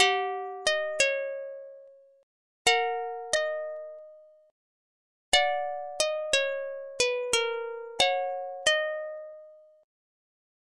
90 Partomik synth 01
standard lofi hiphop synth
free, hiphop, lofi, partomik, synth